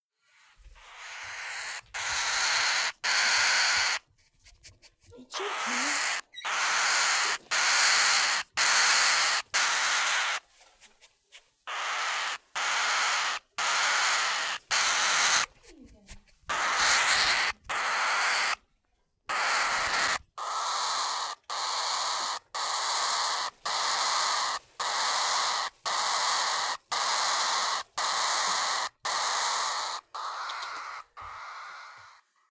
This is the voice of a 3 week old cockatiel going crazy because I picked him up.
baby-bird, bird, cockatiel, field-recording, scream, screech